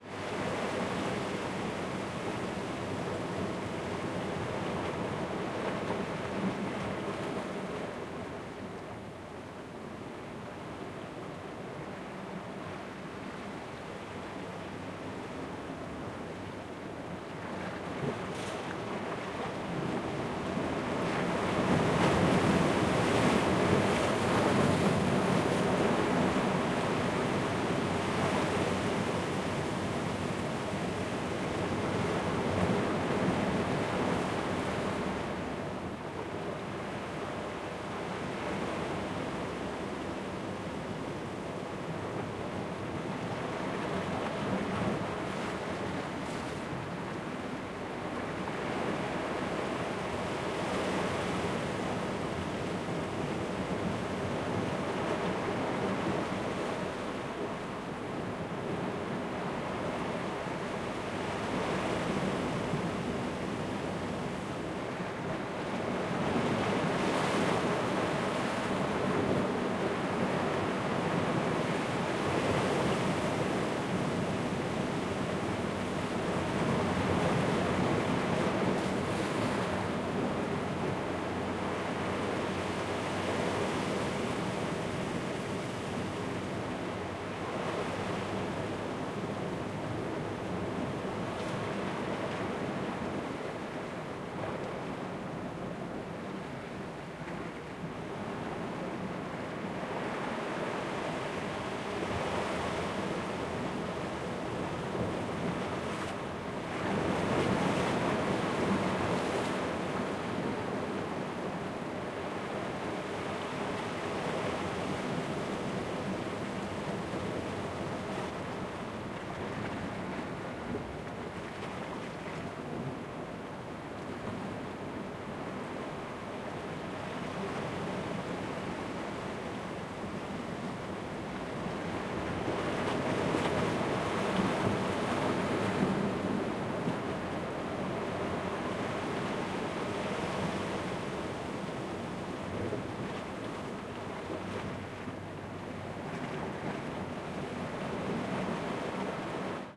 WATRWave General Waves Hitting A Cliff 01 JOAO ARAUJO PL
Recorded in Cascais, Portugal.
Edited and de-noised.
Use it for whatever you need.